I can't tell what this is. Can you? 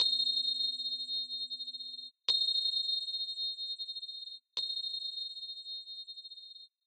Electonic-whistle-1-Tanya v
whistle, sfx